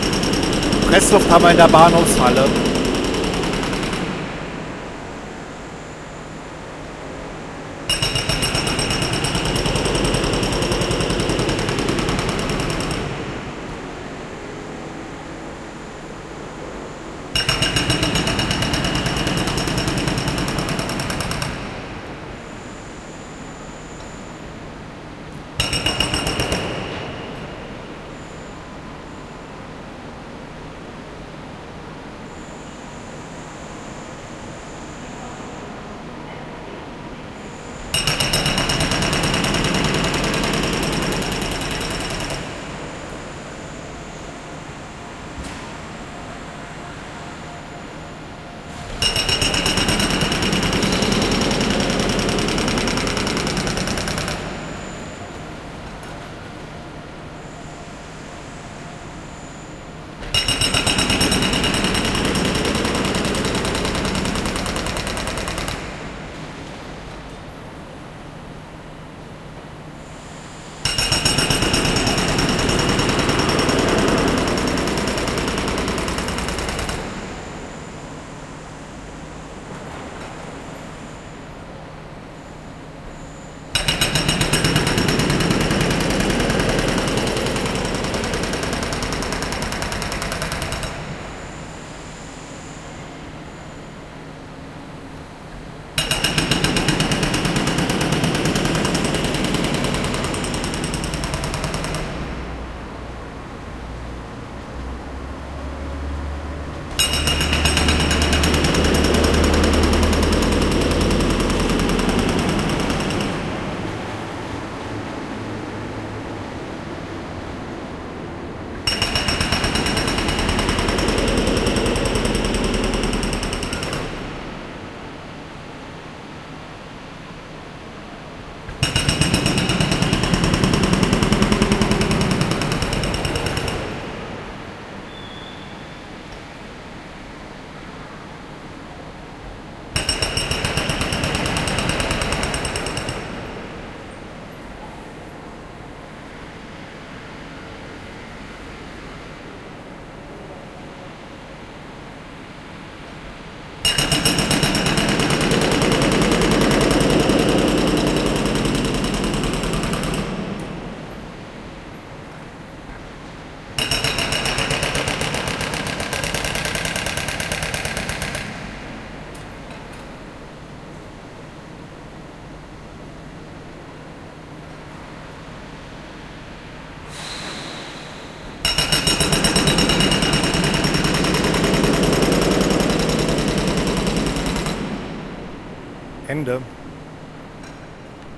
Sound Atmo in Central Station. Frankfurt/Main. Germany